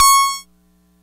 multi sample bass using bubblesound oscillator and dr octature filter with midi note name